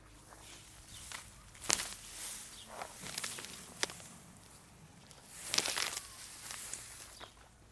tlf-walking grass 01
Walking in tall grass